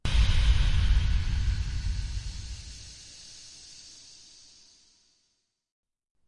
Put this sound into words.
Alien Chamber Opening
Heavily edited in Audacity, Recorded on Yeti Mic in quiet bedroom
ambi, cyborg, droid, galaxy, robotic, spaceship